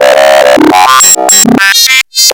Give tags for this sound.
sequence
an1-x

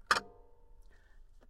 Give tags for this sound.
toypiano
sample
piano
toy
samples
instrument
keyboard
note

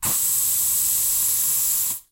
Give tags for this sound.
spraycan
long
air
can

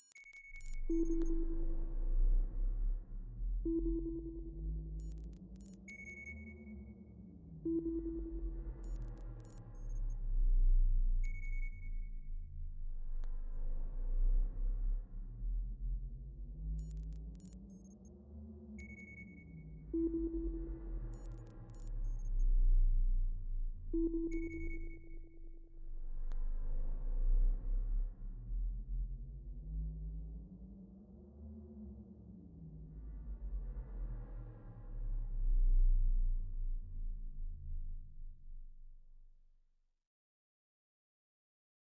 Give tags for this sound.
cinimatic
soundscape
space

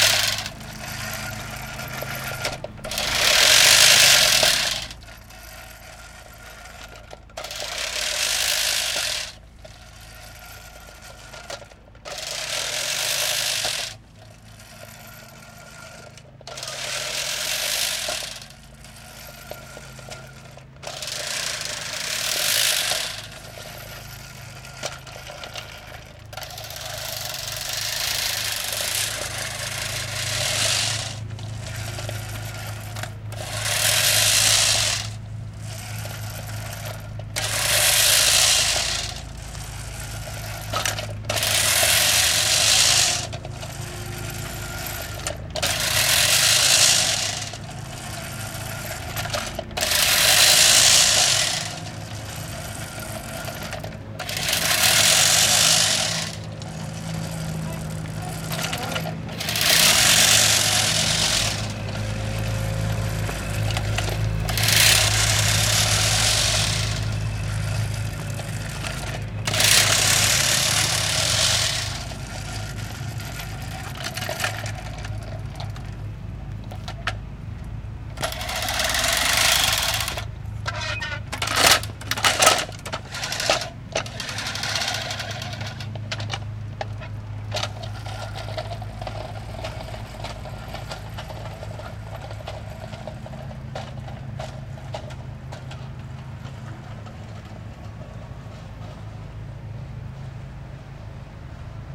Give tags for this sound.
old
lawnmower
crusty

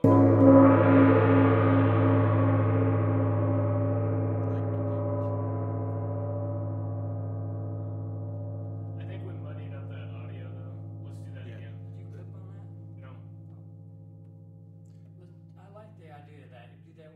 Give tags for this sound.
Medium-loud
Sample
Gong-strike
Reverb

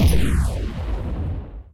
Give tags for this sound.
audacity
space

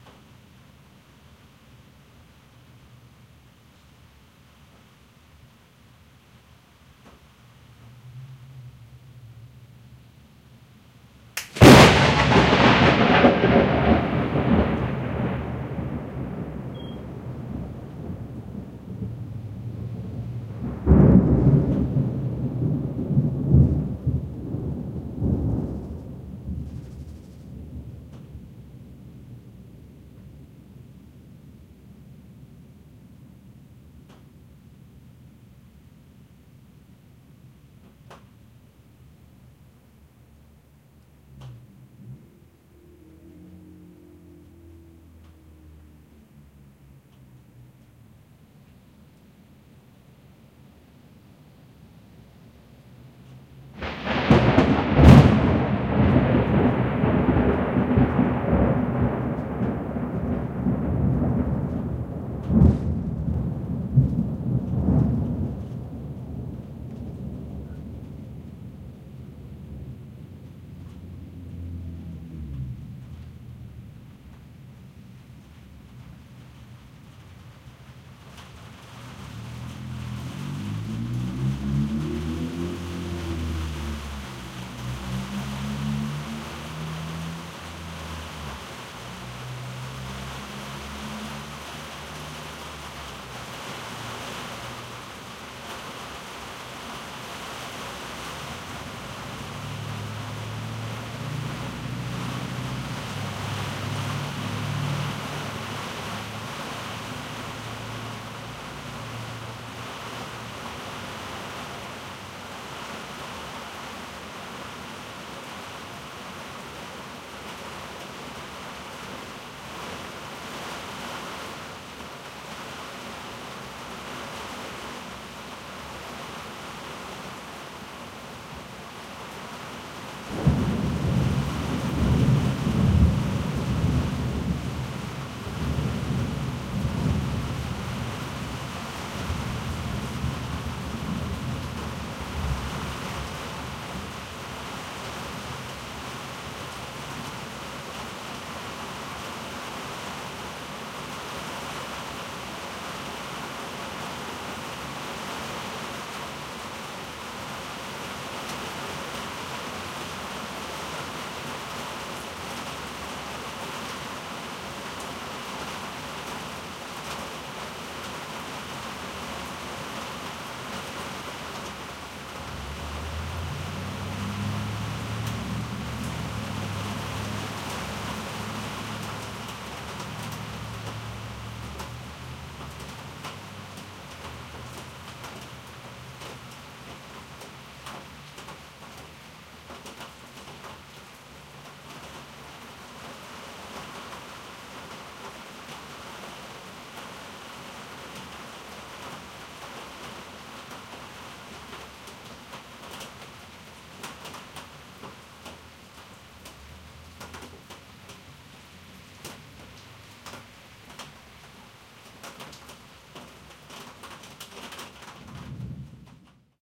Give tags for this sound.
Rain
Lightning
Crack
Thunder

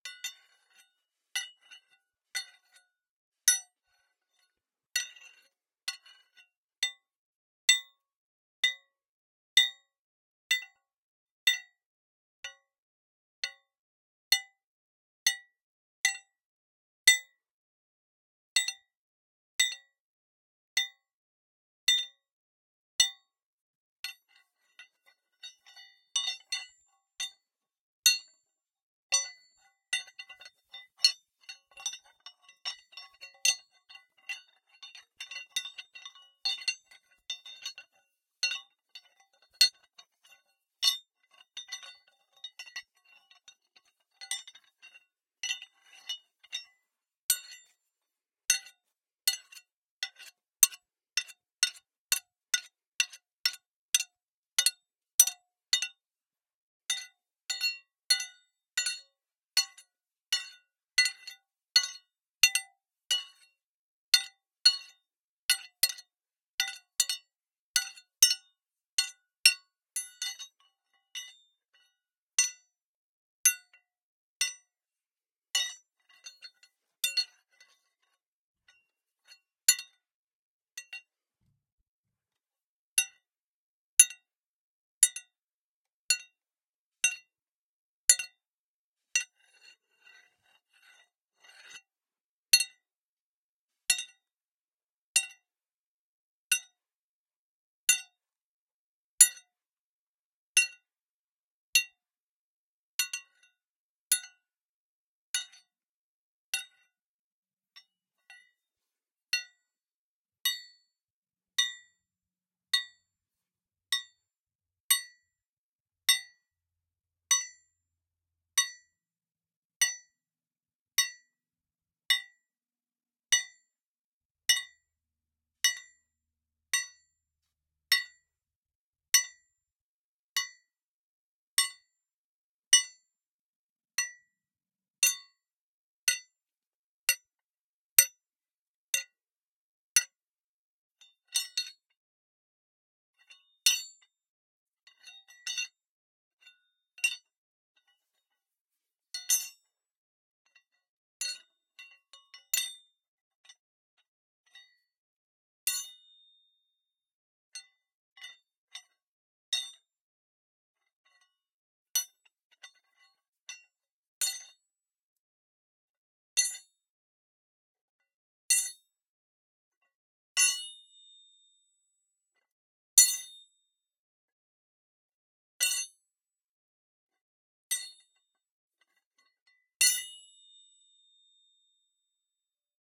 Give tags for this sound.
iron
metallic
onesoundperday2018

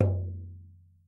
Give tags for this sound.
drum
toy
real

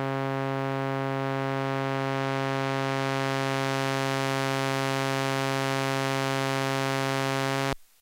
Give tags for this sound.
synth
sound
sfx
Monotron
sampler
korg
Sample

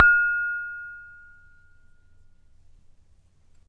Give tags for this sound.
cristal
hit
bowl
crystal
taa
vidro

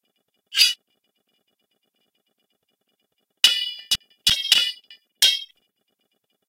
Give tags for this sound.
unsheath
noise
sheath
crash
clash
swish
sword
metal

